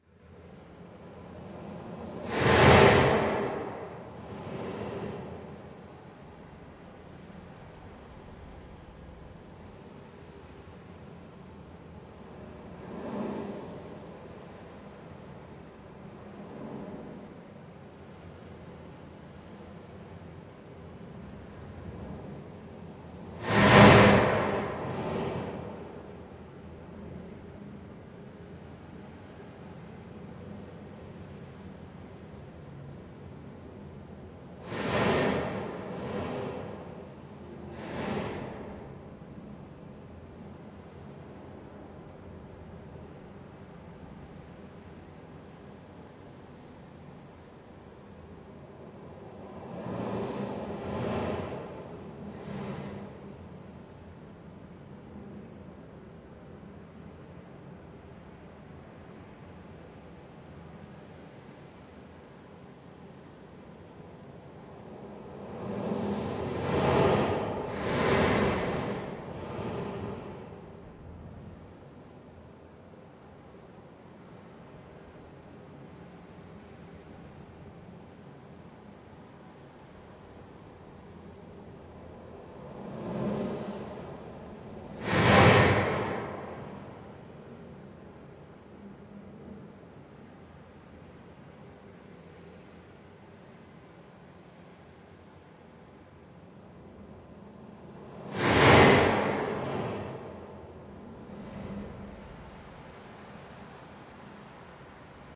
An effected file of the noise from a "blow hole" seaside along the north side of Oahu in Hawaii
rem, breathe, sleep, dreaming, daemon, monster, dragon, breathing